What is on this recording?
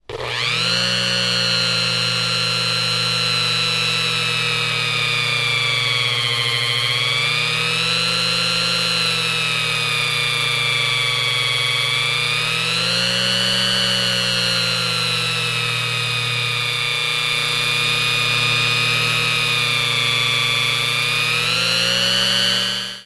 Electric Motor Whir
Dremel Tool motor spinning.